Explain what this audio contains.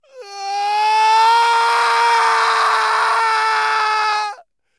Sad cry 1
Just so sad about something.
Recorded with Zoom H4n
acting, agony, anguish, cries, cry, emotional, grief, heartache, heartbreak, howling, male, pain, sadness, scream, sorrow, voice, wailing, yell